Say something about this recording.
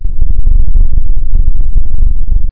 Really weird sound
audacity, weird, bizarre